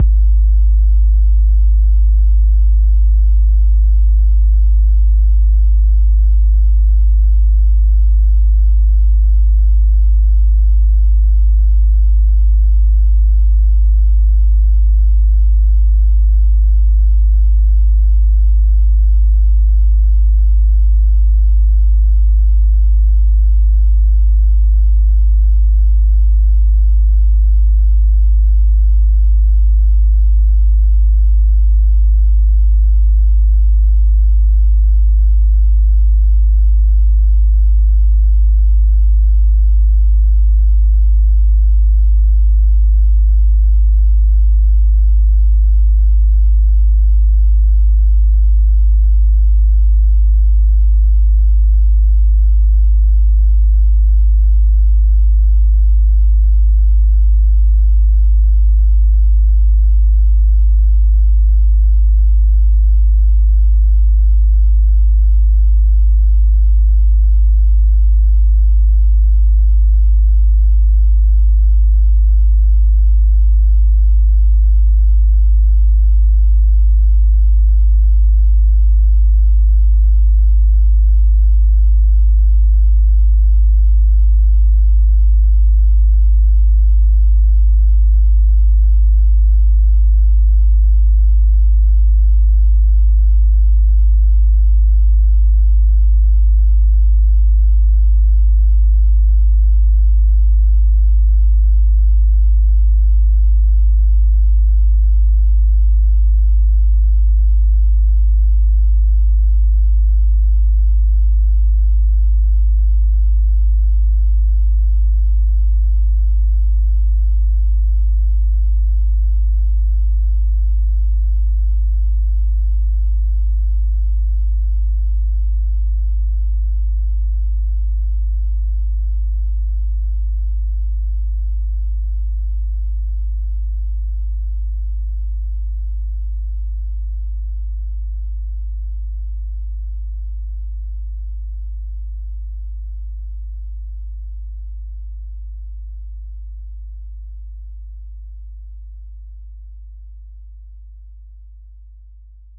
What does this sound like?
More Longer 808
Just a long 808
Drum, Drums, EDM, Electric-Dance-Music, Kick, Sample, Stab